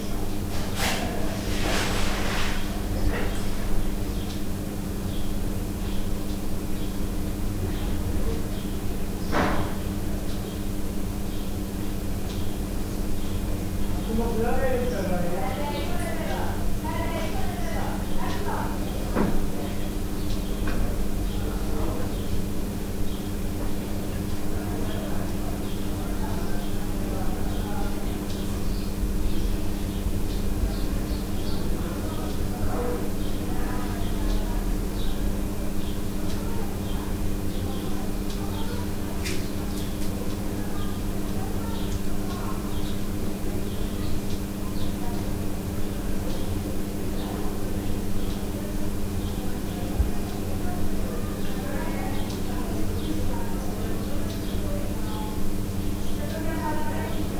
indoors ambient room tone distant bird occasional people jabbering
ambient, bird, distant, indoors, jabbering, people, room